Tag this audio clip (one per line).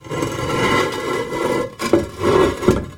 toilet
scraping
ceramic
scrape
grinding
grind
drag